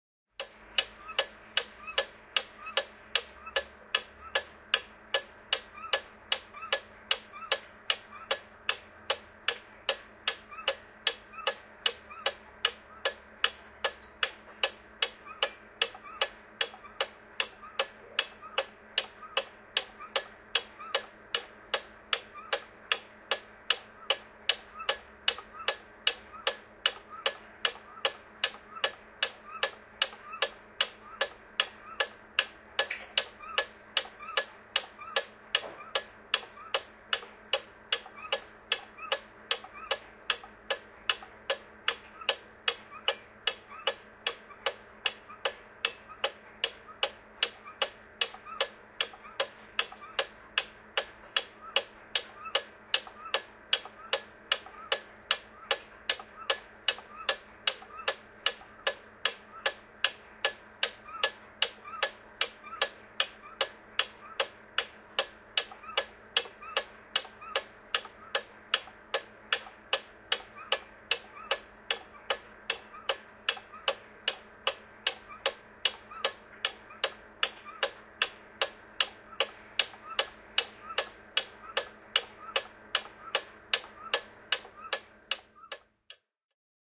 Käkikello, kello, tikitys / Old cuckoo clock in a room, ticking, squeaks
Vanha käkikello, kello käy, tikittää huoneessa, kellon vinkuvää käyntiä, vinkaisuja.
Paikka/Place: Suomi / Finland / Nummela
Aika/Date: 01.01.1992